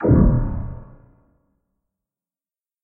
Sci Fi sounding hit reminding of an electro magnetic shield being struck. This can also be used for firing futuristic weapons.